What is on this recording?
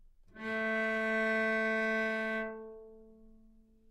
Cello - A3 - other
Part of the Good-sounds dataset of monophonic instrumental sounds.
instrument::cello
note::A
octave::3
midi note::45
good-sounds-id::432
dynamic_level::mf
Recorded for experimental purposes
A3, cello, good-sounds, multisample, neumann-U87, single-note